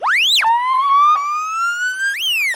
This sound results from applying the HPS model to a few notes from a flute. A 'zipper' sound with tonal chirps are produced, reminiscent of long last days of amateur radio.